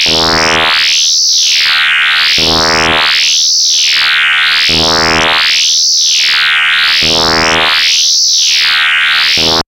quantum radio snap118
Experimental QM synthesis resulting sound.